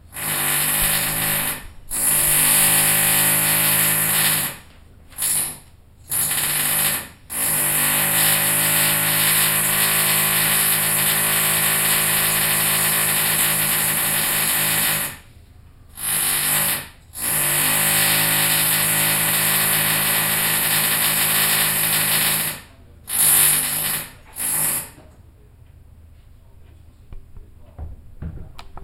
Electric Jack Hammer
recorded this at work when some little git was at work hammering the concrete blow out above my head on the roof
zoom h4n
drilling, wall, jack, hammering, hammer